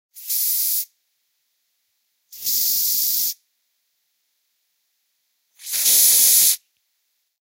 Feild-recording, Wind, Water, Fire, Earth.
Earth, Fire